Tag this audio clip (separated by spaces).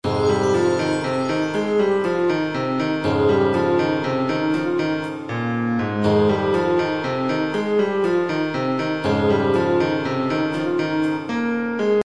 Flute awesome-sauce blow-away cinematic other-stuff piano